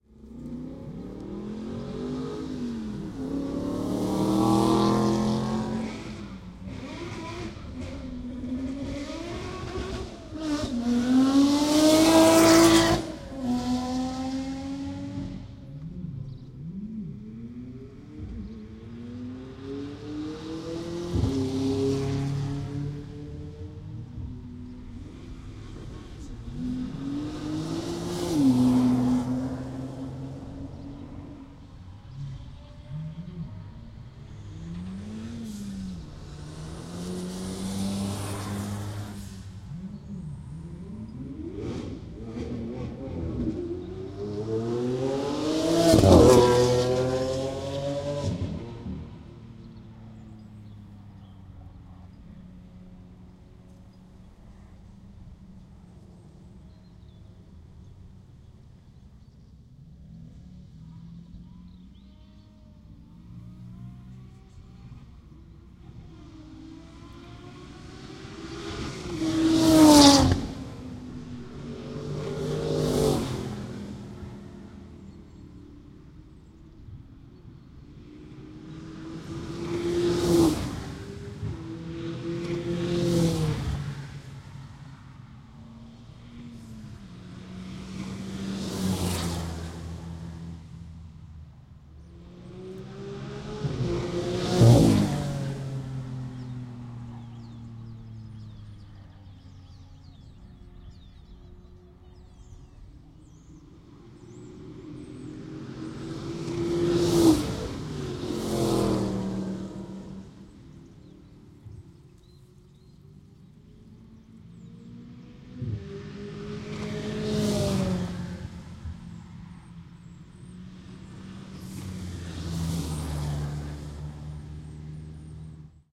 Trackside Goodwood
Recorded opposite the start at Goodwood Circuit on a wet and windy day in March. Cars pulling out one at a time on wet tarmac.
Mixpre3 and Rode SVMX.
car, engine, goodwood, motor, noise, racing, supercar, track, uk, wet, windy